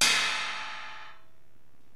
crash 2 ting cut
This was hit on my trash can sounding 14" cymbal cut off by hand.
crash, cymbal, rock